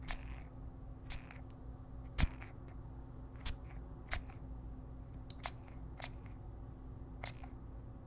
A body spray bottle is spritzed. The gain has been increased in Pro Tools.